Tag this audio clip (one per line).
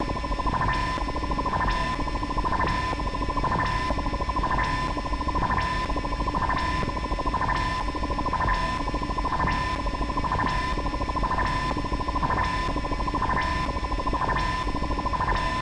loop
industrial